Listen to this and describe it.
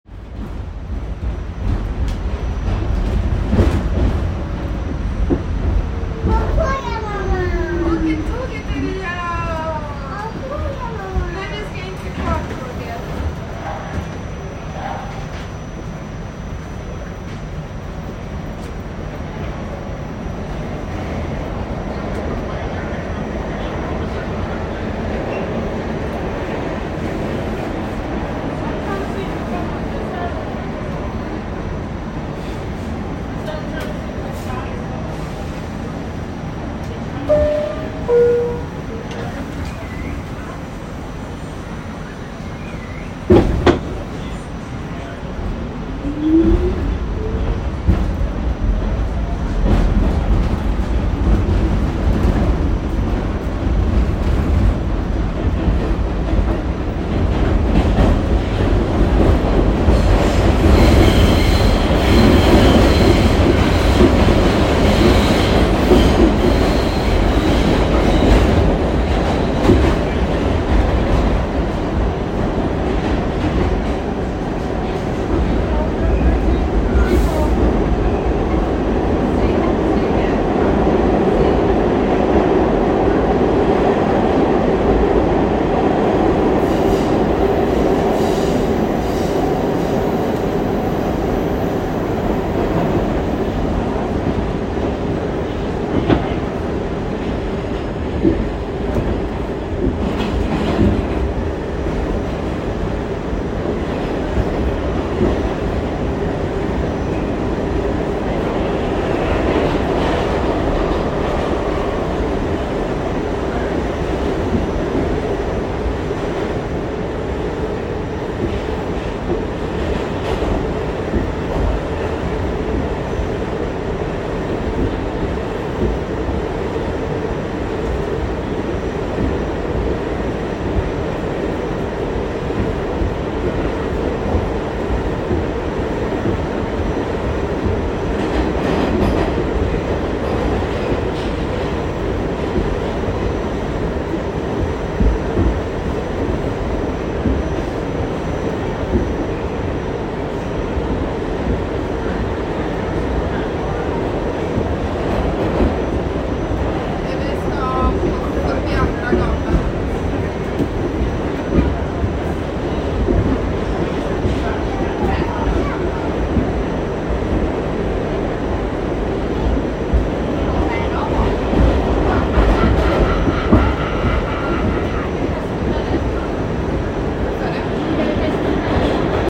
NYC Subway. A train express on northern Manhattan. 10 PM
Subway train off peak hours going Southbound on upper manhattan. Noisy car. A couple of stops. Some people chatter.